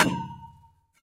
Plastic sewage tube hit 16
Plastic sewage tube hit
hit, Plastic, sewage, tube